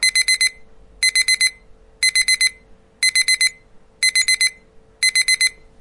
Timer alarm detector bleeping beeping
Sound of an alarm, timer or detector ringing. Bleeping four times in a pulse and then silence. Quiet background noise of a machine running.
alarm, alarm-clock, beep, bleep, clock, cooking, detector, digital, egg-timer, electronic, morning, radar, ring, ringing, timer, wake, wake-up